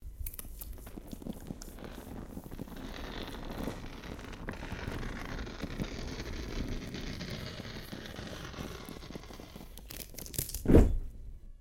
Loathsome peeling
A composite sound effect I made for a writing prompt on my show '100 Words of Astounding Beauty.' It was recorded using a Tascam DR-100 Mk2 and edited using Audacity. It is made up of the sounds of zips, hairties, tearing paper, a comb and the rubbing of flesh.
Feels like a good fit for a creature SFX, useful for horror or SFX.
cocoon, creature, hatching, sound-effect, unzipping, zipping